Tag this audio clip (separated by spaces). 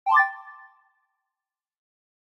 cinematic confirm UI interface select screen game click GUI bleep signal switch keystroke alarm menu button application computer beep fx command effect film typing gadjet alert blip sfx option